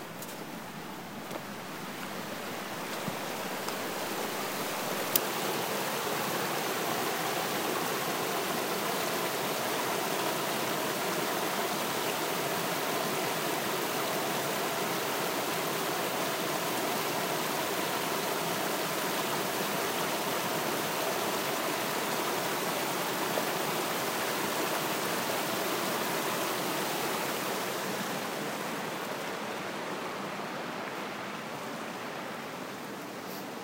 Rushing River Water
Water rushing from a structure on a river.
Early morning, February 21 near Clark Fork River.
River, rushing, water, waterfall